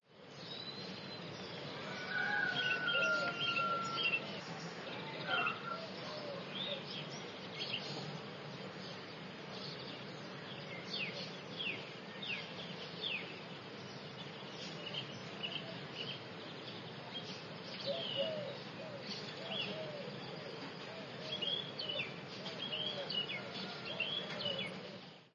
Waterfall&birds ambiance
waterfall in the woods close to a town